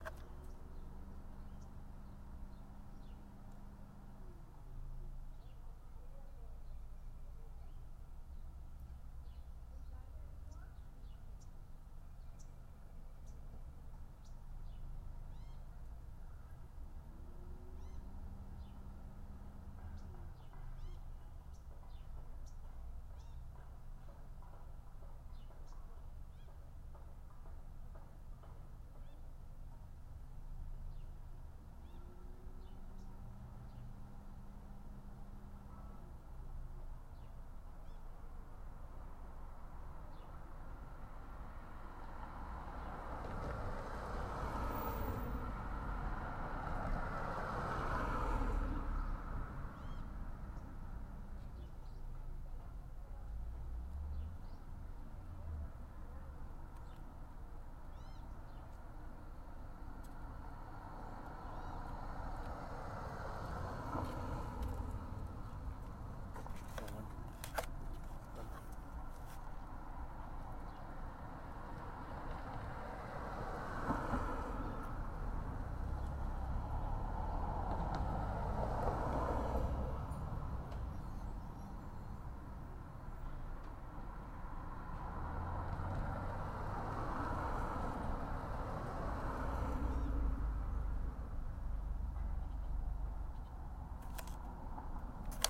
Ambient sounds made for my Sound Design class
field-recording, park, birds